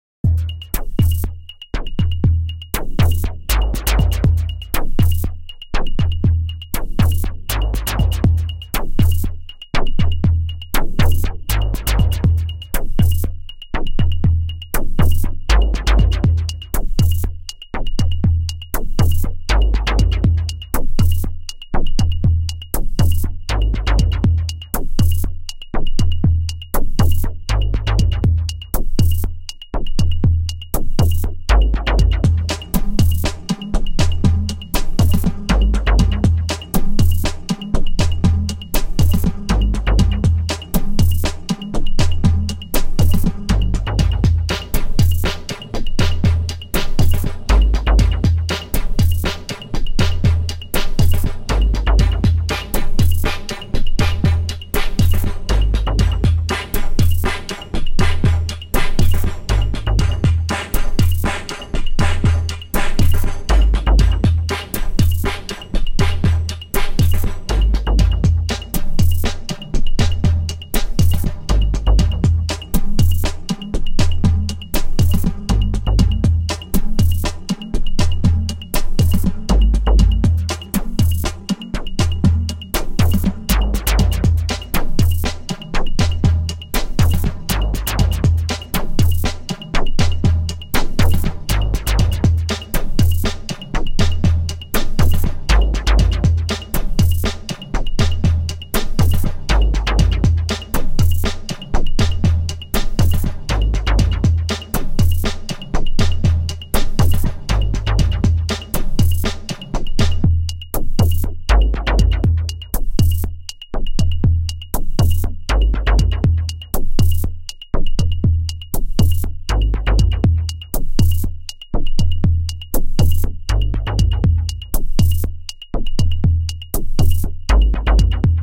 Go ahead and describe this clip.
Drum loop and hit Fx.
Edited in Ableton live,Silenth1 Synth.
dub-step, fx, bounce, techno, Drum, electro, hit, rave, house, loop, dance, minimal, effect, club, glitch-hop